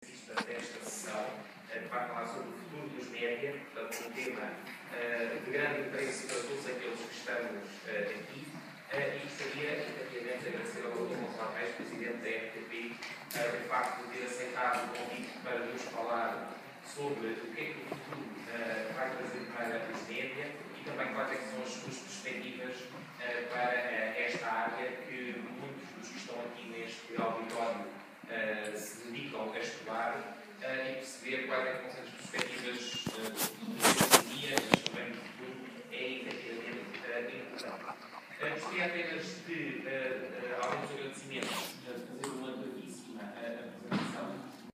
Sounds during the conferences of MyCareer day in the Universidade Católica de Lisboa.
conference, social, university